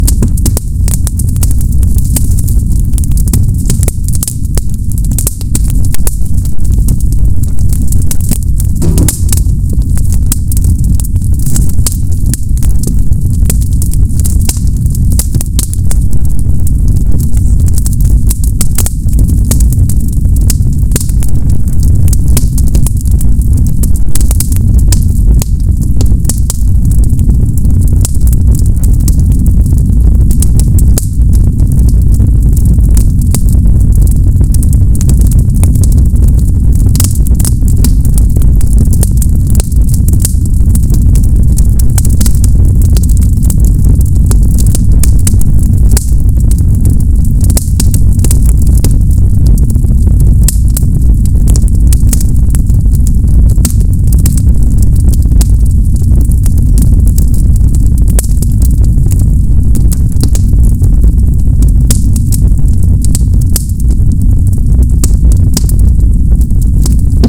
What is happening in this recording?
fire roar and crackle
Recording of my own fireplace made with a Tascam DR-40 (internal mics). I blasted up the lower freqs, kept the mid-range close to 0, then from 5-16 khz went back way up, slowly tapering down to below zero for the highest frequencies. All editing done in Audacity, compressed using Soundkonverter in Linux.
This is a good sound effect for either a bonfire or a house burning down, which is what I made it for.
house, Fire, fireplace, bonfire, burning